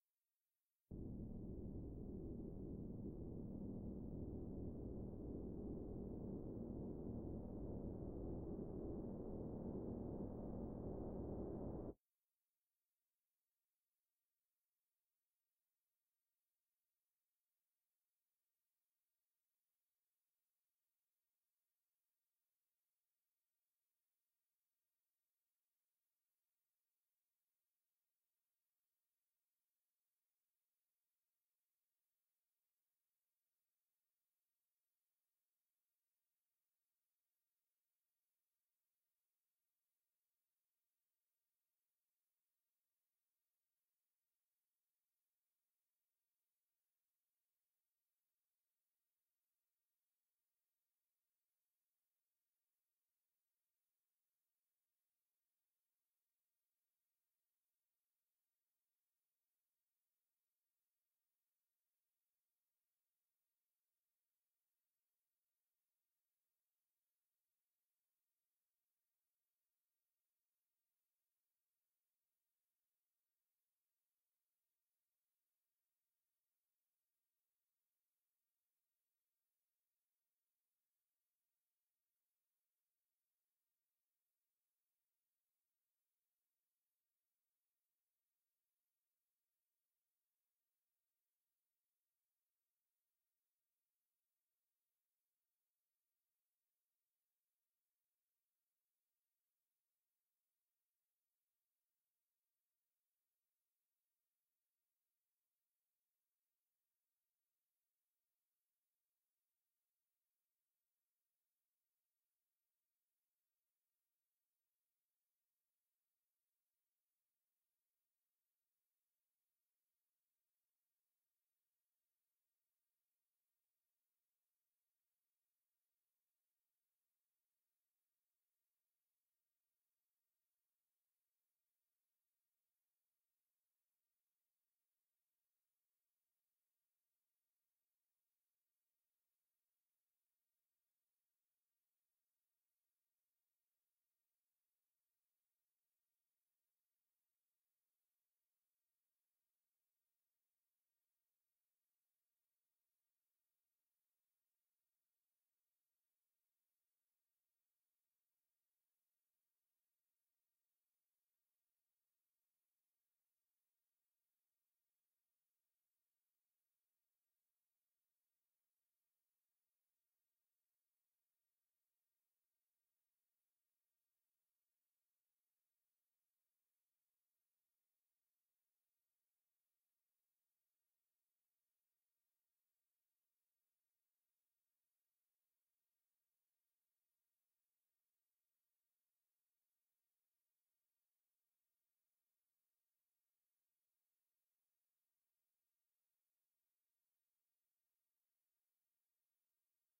AIR VENTANA Aire
Daniel, MIDI, Guevara, Nossa, effect, Trabajo, AIRE